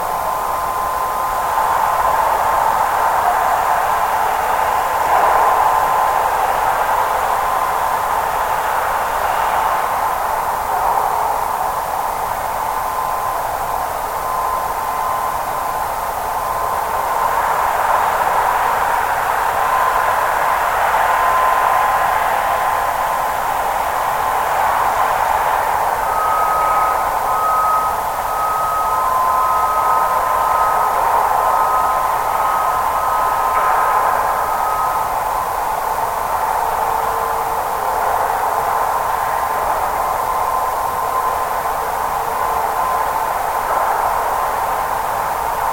Denver Millennium Bridge 00
Contact mic recording of the Millennium Bridge in Denver, CO, USA, from the base of the main pylon. Recorded February 21, 2011 using a Sony PCM-D50 recorder with Schertler DYN-E-SET wired mic.